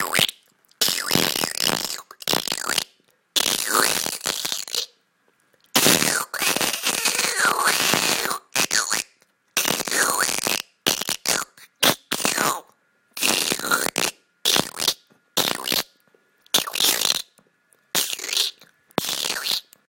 Some kind of animal noise made with my mouth. Recorded with iPhone 4.